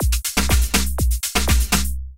house loop 2
house, loop